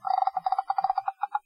grudge sound6
the type of sound that kyoko makes from ju-on (the grudge)